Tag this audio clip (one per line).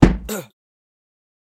Impact
Voice
Male